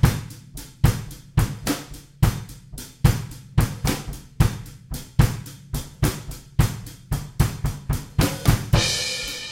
This is a drum pattern played by me for a song. It's a full mix of three microphones - one behind the kit, along with snare and kick drum mics.
mix, microphones, three, kit, live, 4-4, full, beat, drum